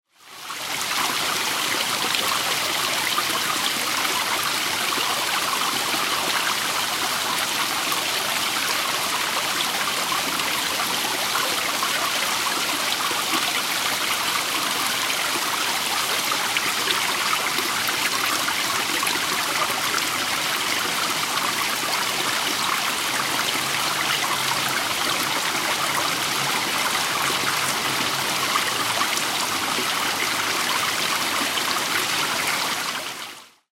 City Drain
city, oregon, portland